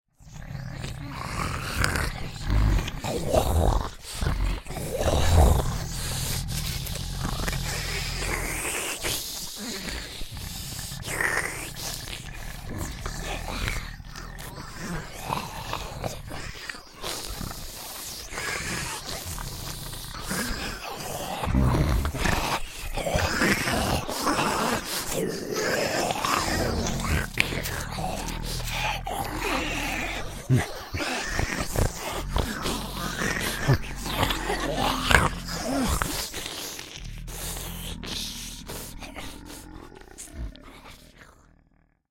Monster Groans, Grunts, Slobbers
Several monsters/zombies grunting, moaning, salivating, and growling. Created using processed/ effected vocalizations.
grunt, moan, slobber, zombie